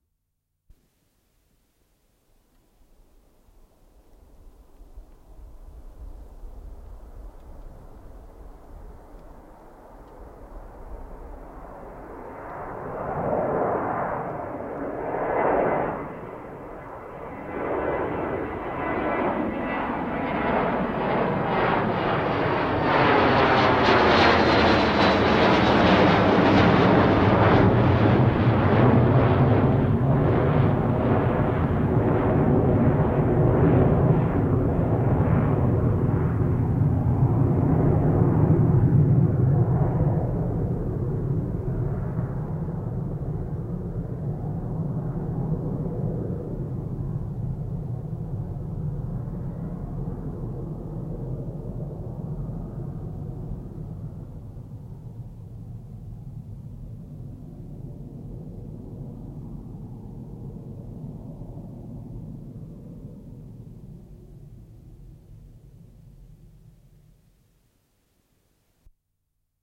Jet aircraft Super Caravelle overflight // Suhkukone Super Caravelle ylilento
Matkustajakone Super Caravelle. Ylilento nousussa, äänitetty kiitoradan päässä.
Passenger plane Super Caravelle. Overflight after take off. Recorded in the end of the runway.
Paikka/Place: Helsinki-Vantaa lentoasema / airport
Aika/Date: 12.1.1969